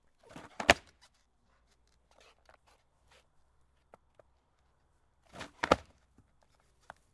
Skate jump on grass 4
Long board stake, hard wheels. Recorded with a Rode NT4 on a SoundDevices 702
grass, long-board, skate, jump